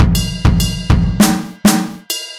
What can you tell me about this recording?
100 Studio C Drums 03
synth, digital, dirty, drums, crushed